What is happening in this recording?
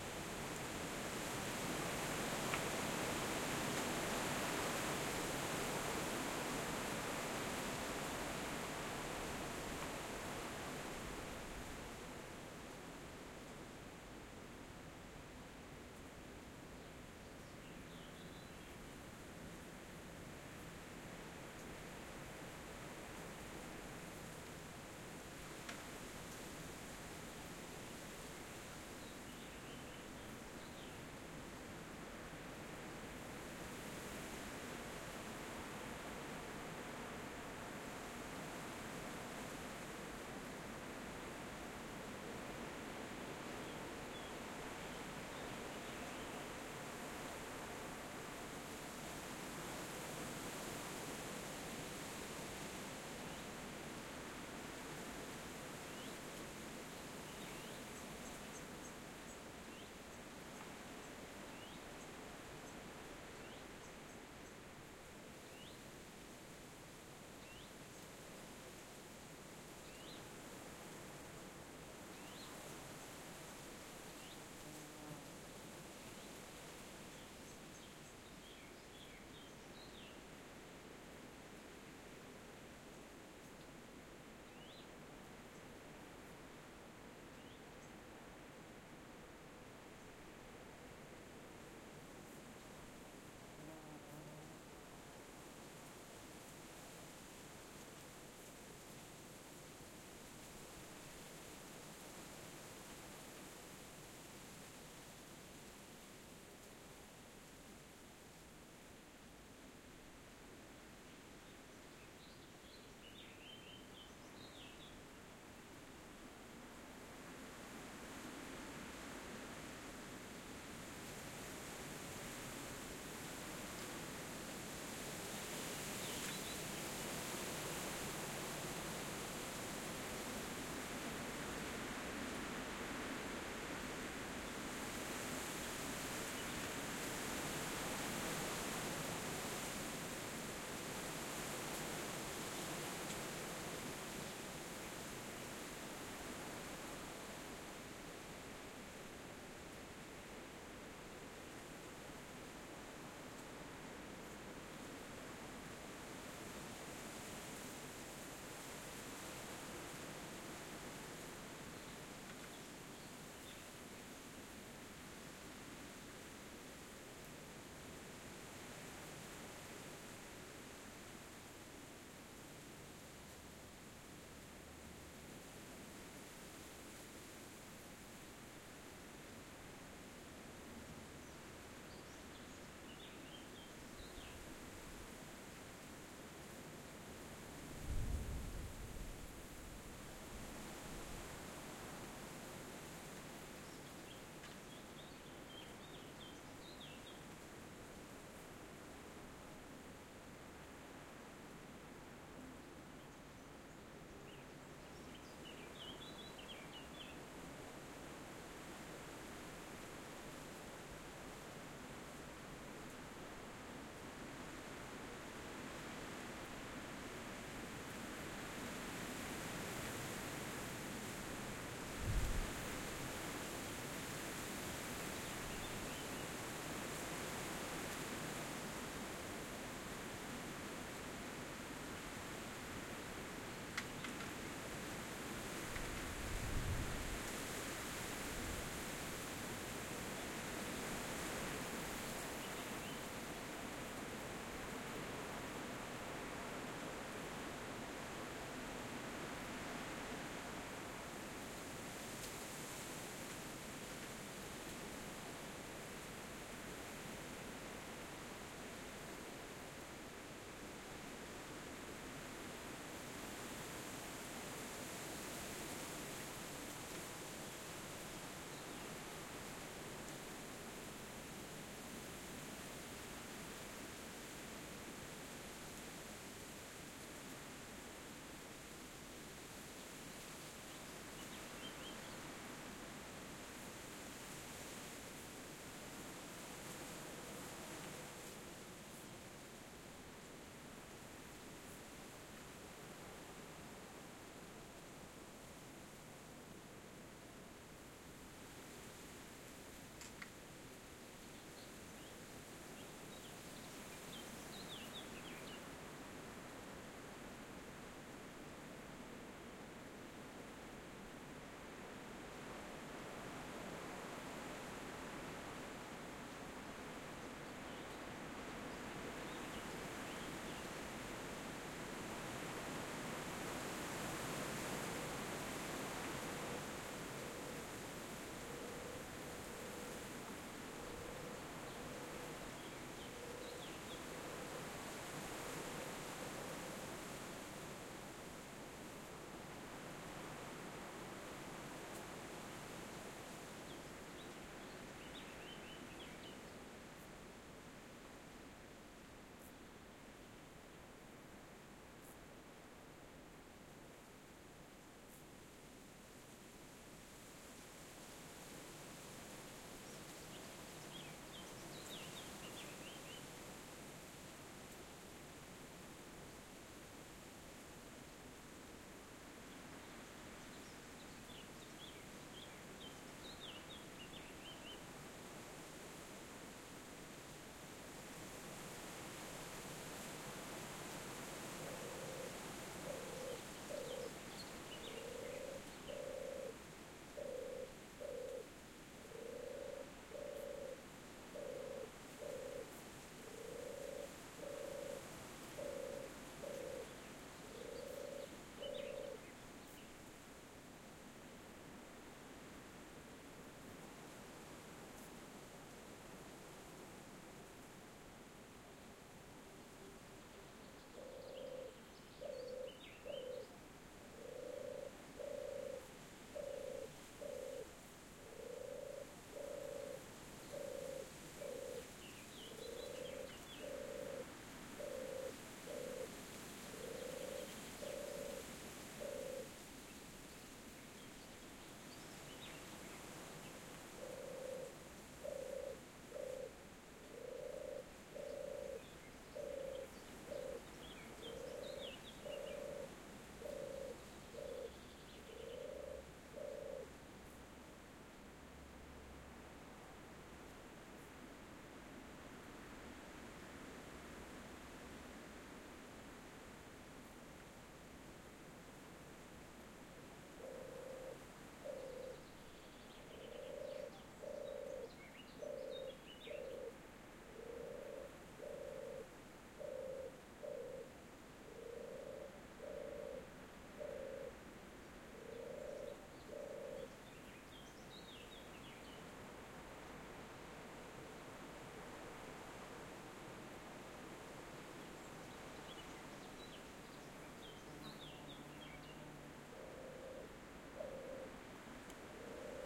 medium wind in trees birds
Larzac plateau medium wind in trees. some distant birds, a close turtledove at the end.
recorder sonosax SXR 4
mic: Stereo orth schoeps